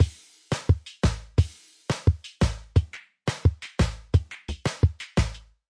Chilly Billy 87BPM
A chilling drum loop perfect for modern zouk music. Made with FL Studio (87 BPM).
beat, drum, loop, zouk